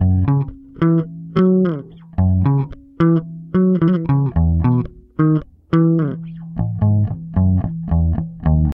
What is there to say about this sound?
Electric bass guitar loop 4 bpm 110
Thank you for listening and I hope you will use the bass loop well :-)